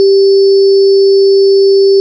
Generated from an FM-based software sound generator I wrote. Great for use with a sample player or in looping software.
two-second, fm, sample, hifi, loop, mono